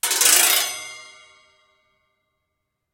mar.gliss.resbars.updn3
Sample of marimba resonance pipes stroked by various mallets and sticks.
pipes, gliss, marimba, resonance